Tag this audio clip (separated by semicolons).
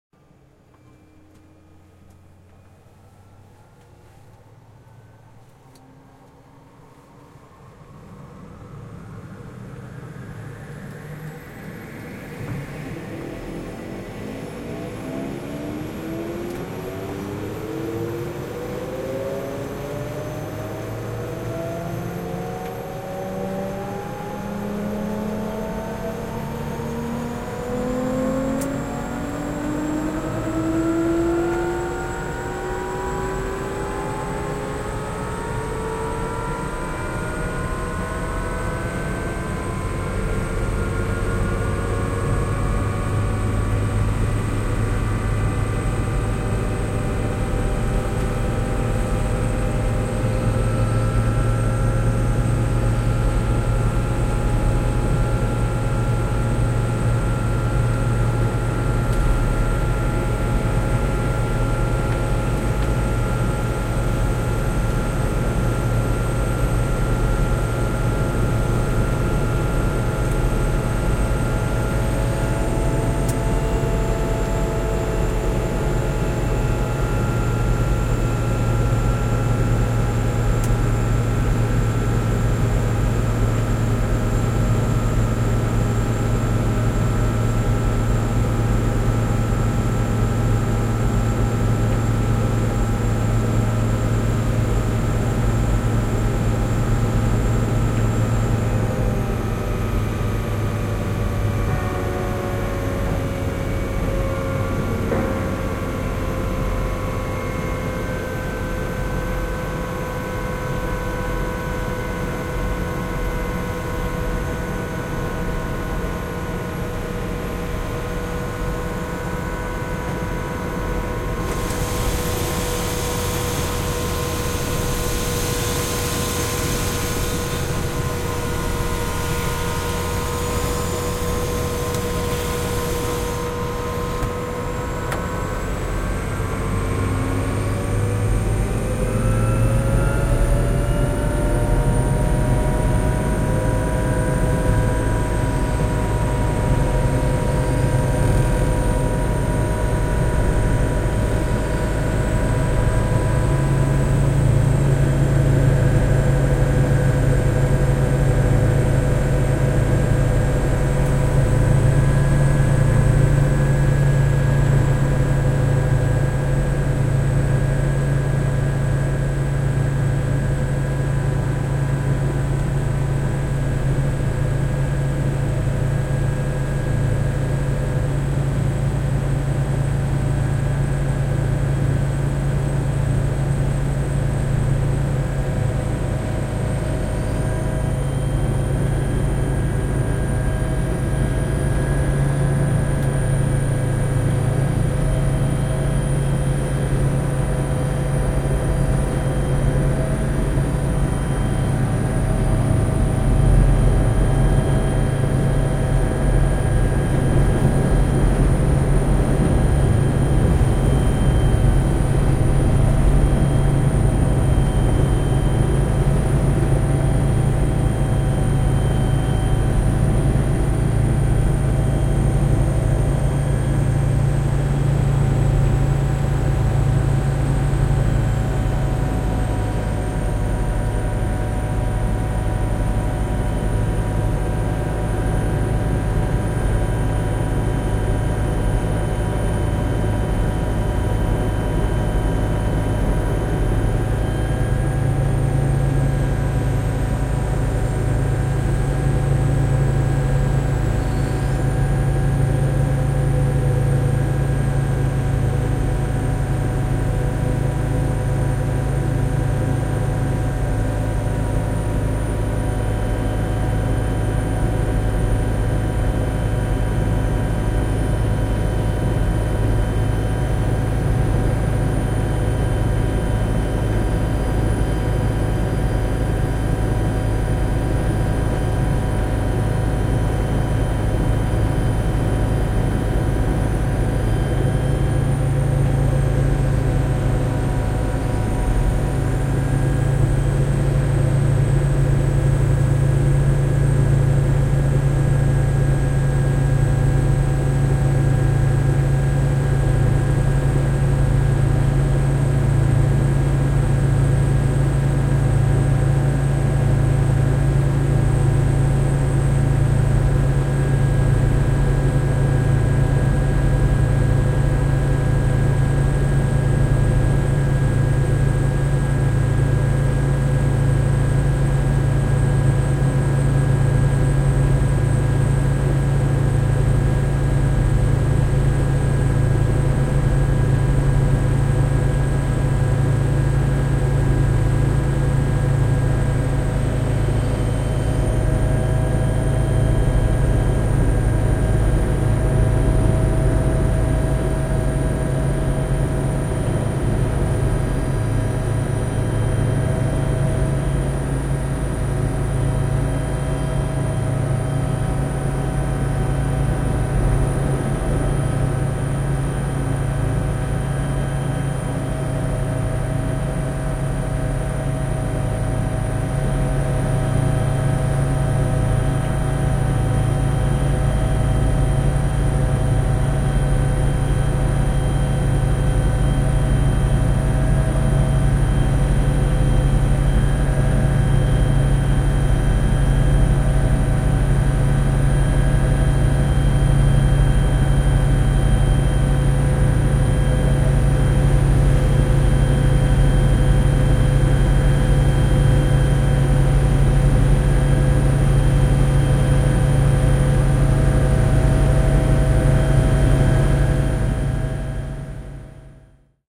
Tehosteet; Flying; Interior; Take-off; Finnish-Broadcasting-Company; Suomi; Finland; Yleisradio; Yle; Helicopter; Helikopteri; Flight; Lento; Nousu; Field-Recording; Soundfx